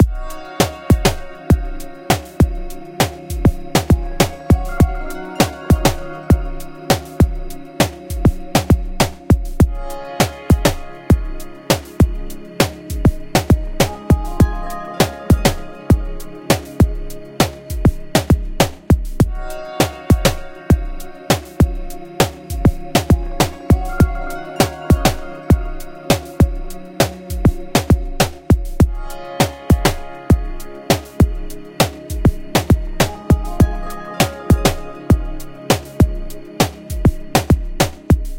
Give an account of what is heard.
Drifting 2nd
Improved version of the previous sound. I focused on making a nice bassdrum thumb. cheers!
delay, synthesizer, choir, atmospheric, kickdrum, 100-bpm, organic, chillout, pad, glitch, drumloop, drum, loop